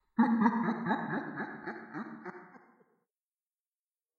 A friend of mine let me record her and I edited her laugh for a school project. I used a Yeti mic to record and Audacity to edit.